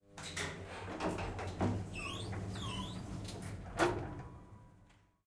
elevator door open 6a
The sound of a typical elevator door opening. Recorded at the Queensland Conservatorium with the Zoom H6 XY module.